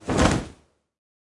clothes drop 1
cloth, Clothes, drop, material